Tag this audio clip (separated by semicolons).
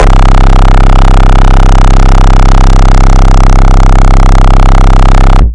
bass; driven; drum-n-bass; harsh; heavy; reece